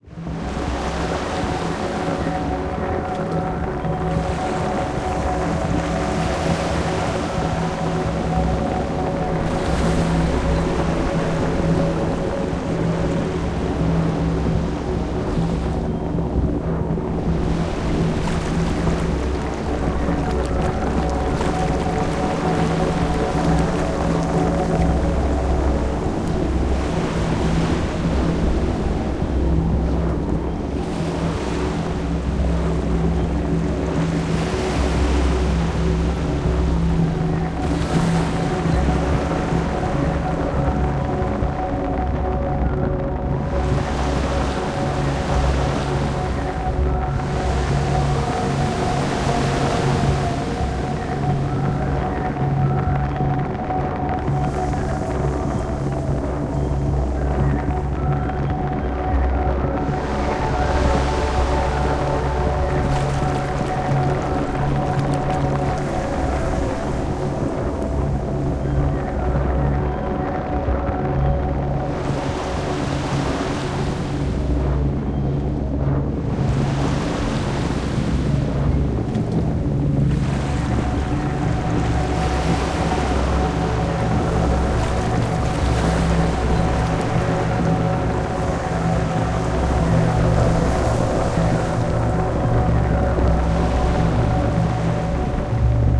Forest rain Atmo Fantasy